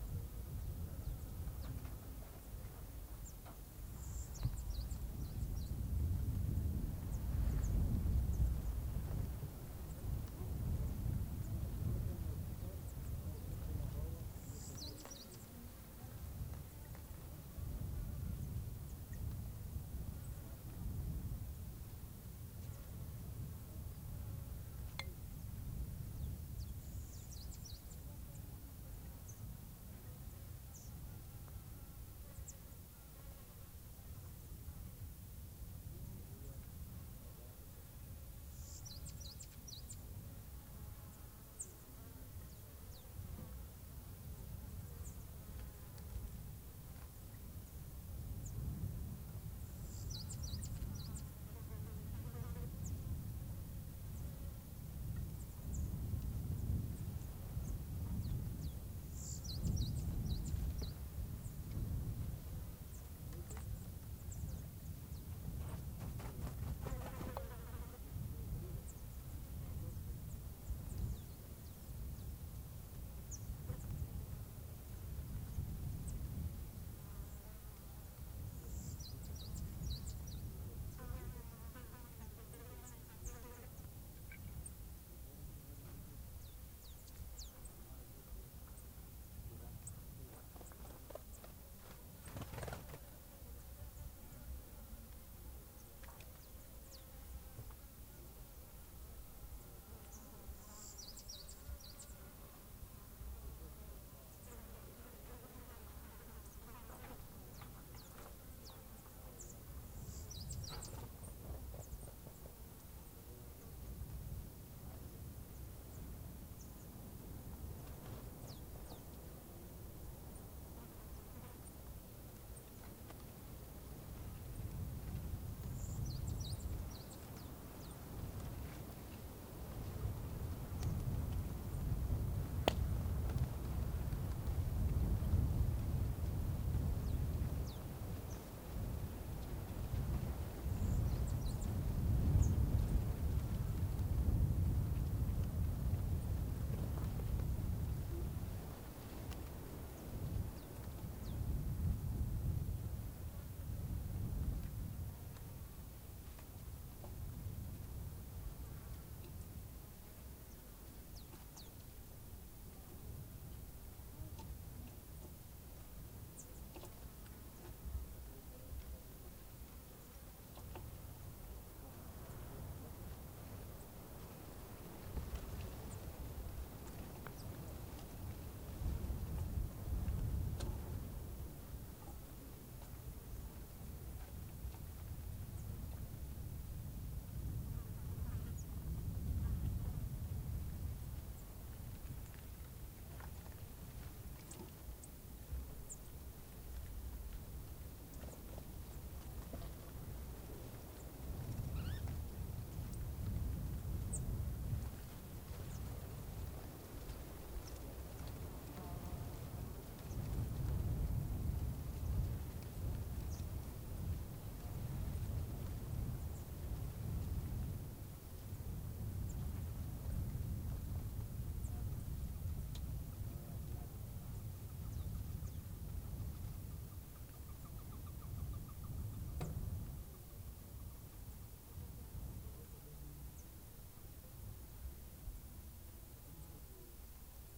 AMB Cementerio Cariquima
Ambience in a cemetery during the afternoon. Birds and wind.
Rec: Tascam 70D
Mic: Sennheisser MKH-416
birds, Cariquima, windy, ambience, field-recording, cemetery